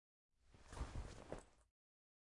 Crossing arms with jacket on
jacket, pass, foley, cloth